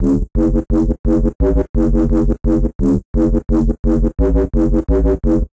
Dirty Bassloop created with Massive.